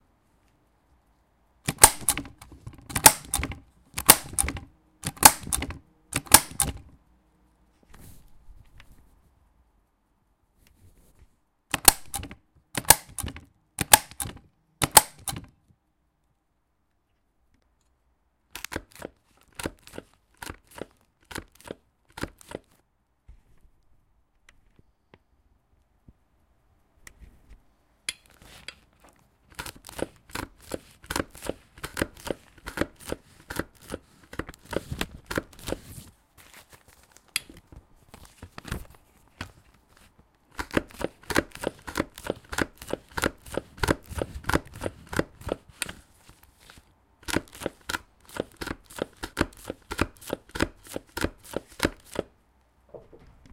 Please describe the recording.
a) stapler and b) puncher recorded at my small office with a Tascam DR-40.